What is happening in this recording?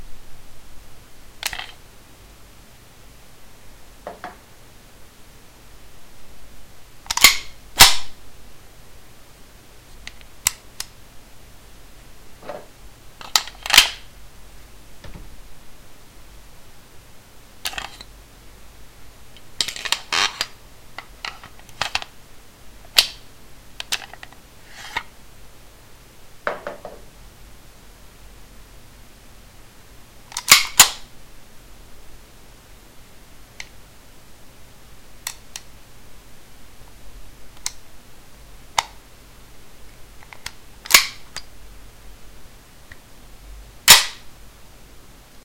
Gun safety check and loading
CZ 75 SP-01 Shadow
gun, pistol, rifle